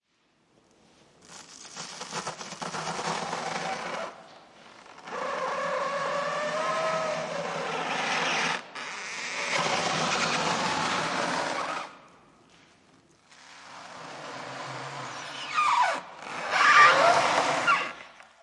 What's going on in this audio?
sawing-PUR-slomo
We saw some PUR foam into pieces. I recorded it in slowmotion (50%) which gives the recording a lot of drama. Of course you can speed it up again to have the normal sounding sound.
foam, sawing, solution, cut, pur